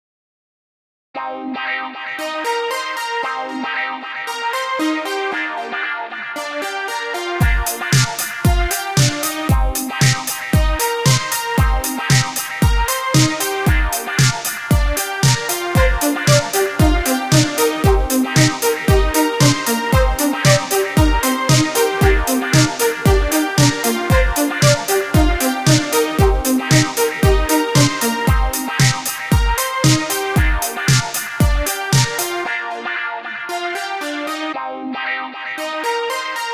Guitar with beat 4 was made on groovepad.
It would be awesome if you could tell me if you use this sound for anything (you don't have to of course). :D